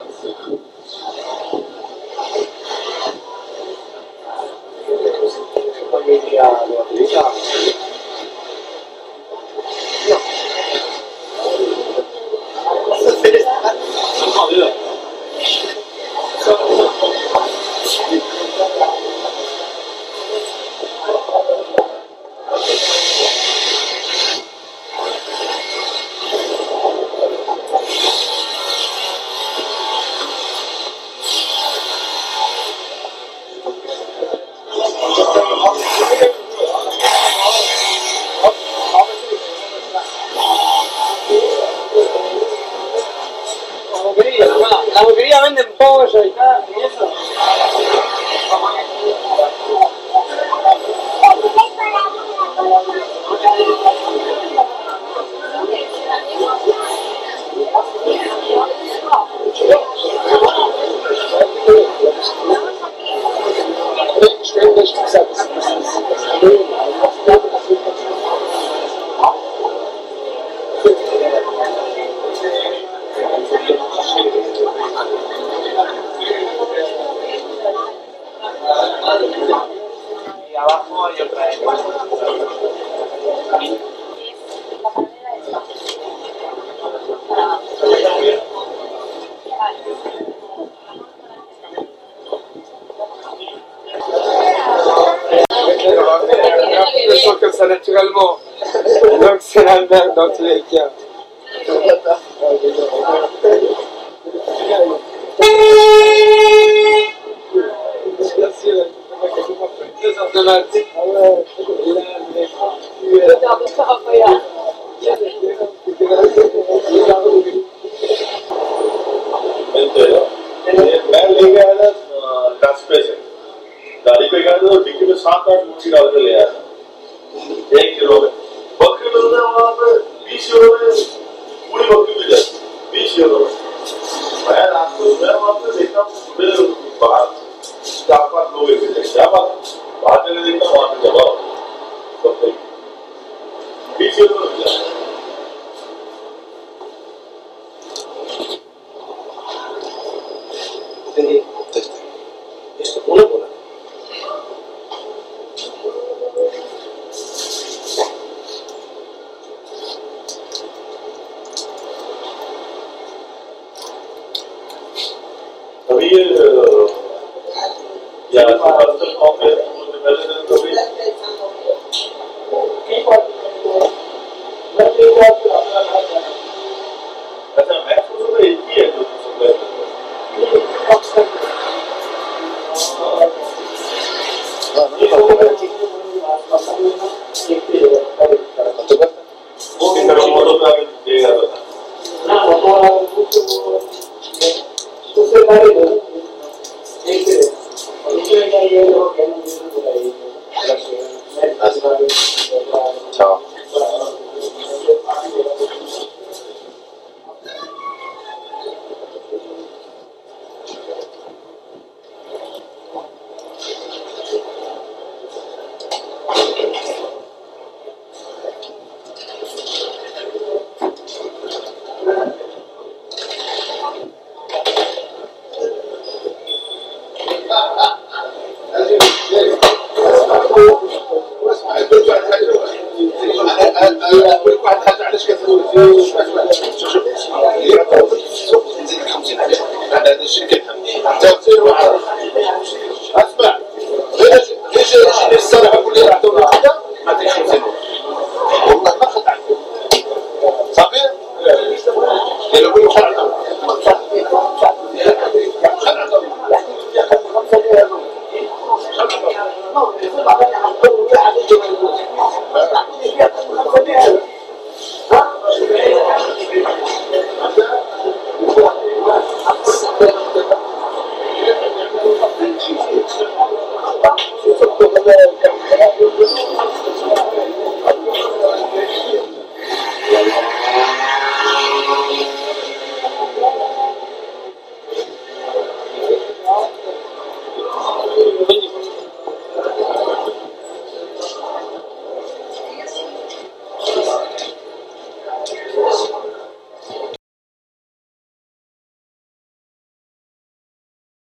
01 Installation Raval
Ambient sound of Carrer L'hospital in El Raval in the centre of Barcelona
catalunya, people, raval, barcelona, spain, el